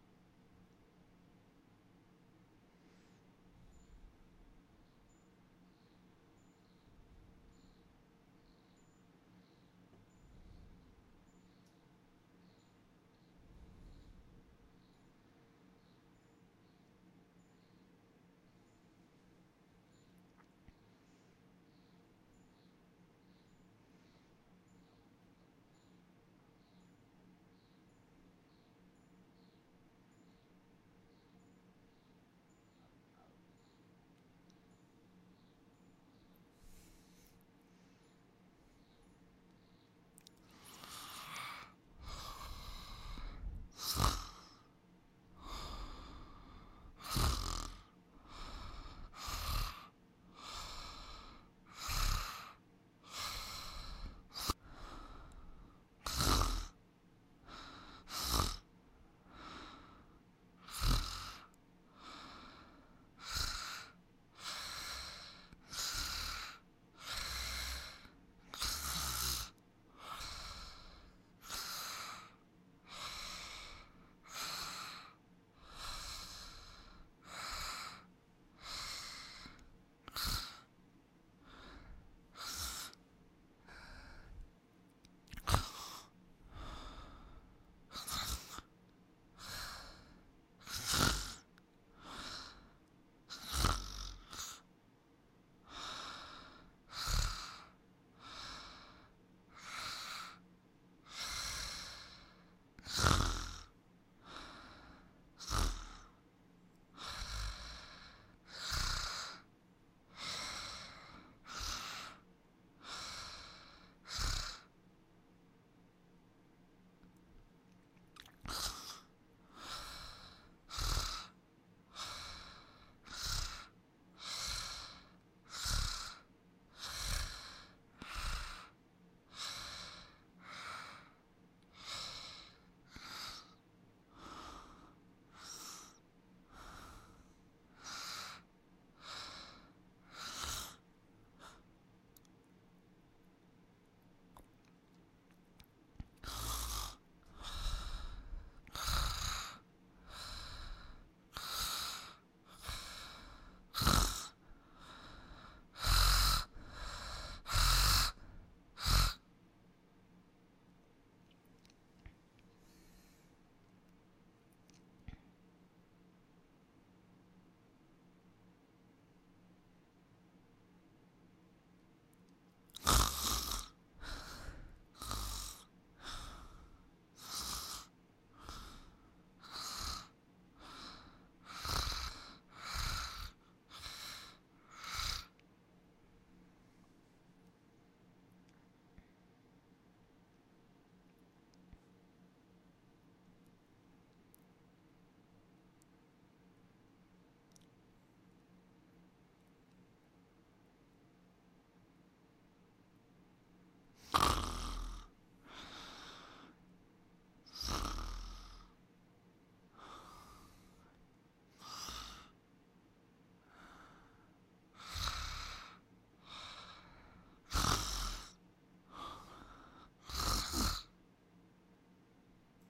13-Woman snoring (voice)-210819 2208
A woman snores and snorts whilst sleeping.